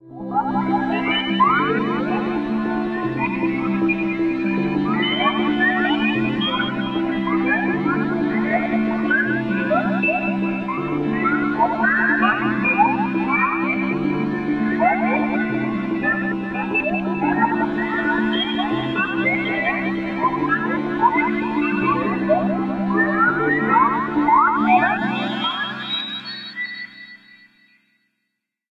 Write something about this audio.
Several happy bees.
Created with substractive sound synthesis in the M-Audio Venom. Only echo used as effect from the same synthesizer.
granular, sound-synthesis, substractive